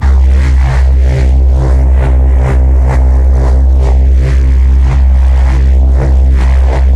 reese 413th
bass, dnb, Reese